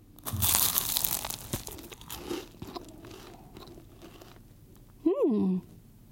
Eating a baguette
Bite into a fresh baked baquetten chewing and mmh...
baguette, bread, eating, francais